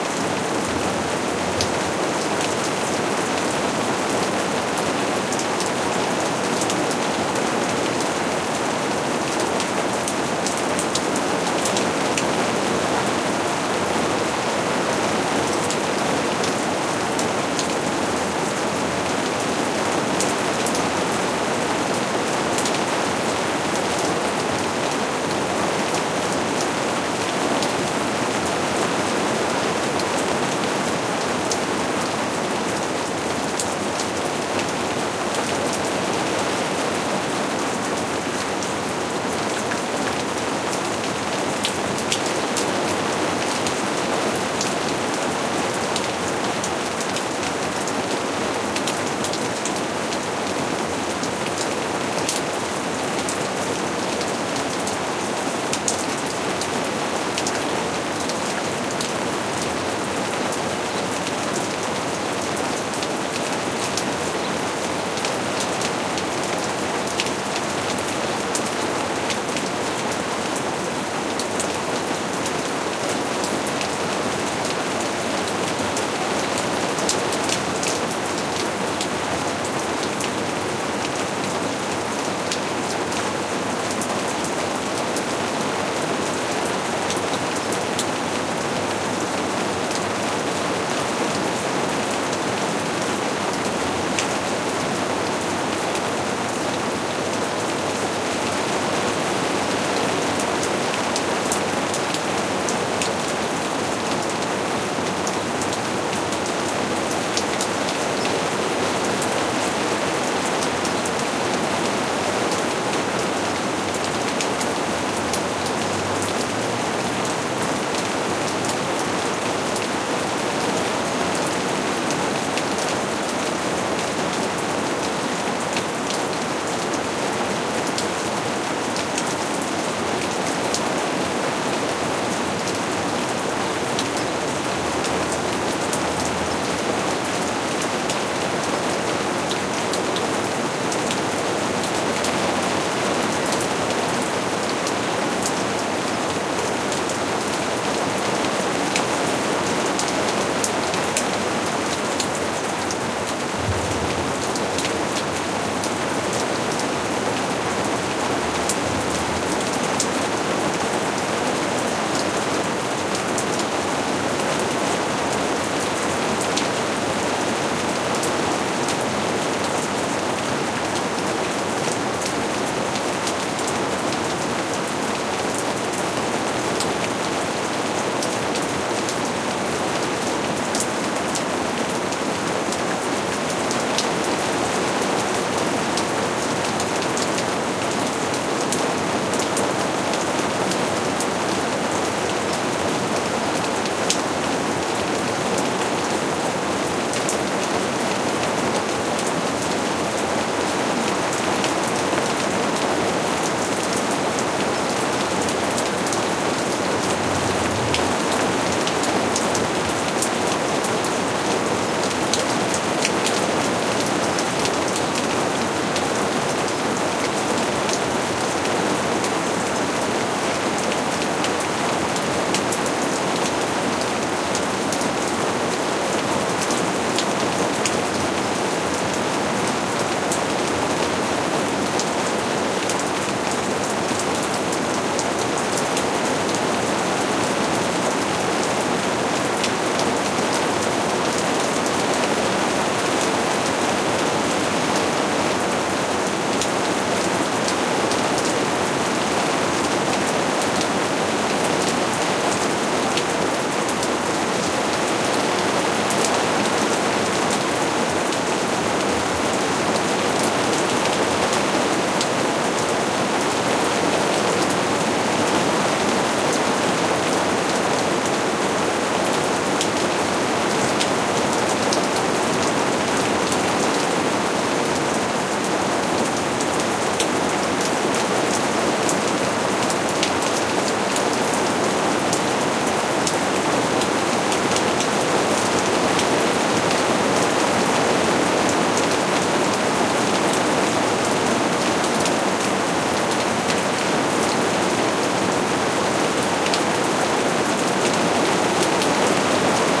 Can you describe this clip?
rain falling on a plastic greenhouse roof, soft. Olympus LS10 internal mics.
field-recording, rain, water